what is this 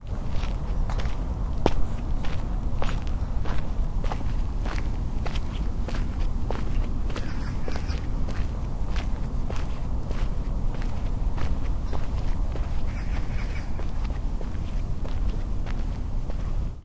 0068 Birds and footsteps
Birds and footsteps. Golf in the background
20120116
field-recording, seoul, birds, korea, footsteps